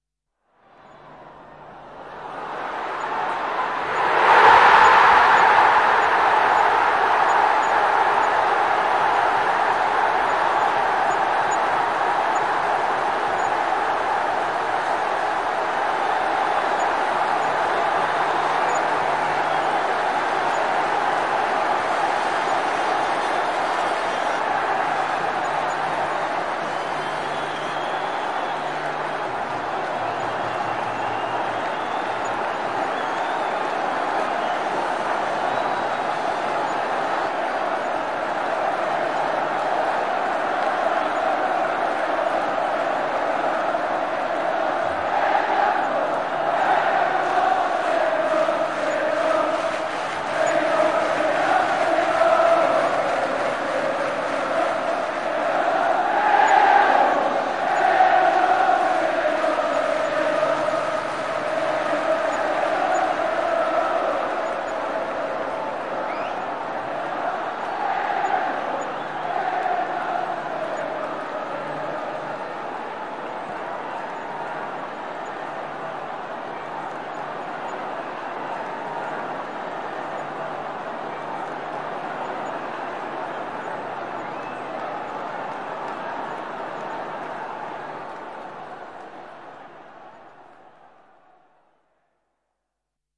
19 Football Crowd - Reaction To Goal
voice Reaction fans to goal in the stadium
cheers, fans, football, goal, match, Reaction, soccer, sport